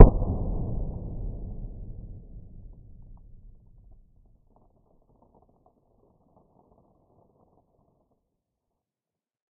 Knall19 1zu16
a systematic series: I´ve recorded the pop of a special packaging material several times with different mic settings. Then I decreased the speed of the recordings to 1/2, 1/4, 1/8 and 1/16 reaching astonishing blasting effects. An additional surprising result was the sound of the crumpling of the material which sound like a collapsing brickwall in the slower modes and the natural reverb changes from small room to big hall
crack, pop, smacker, snapper, whang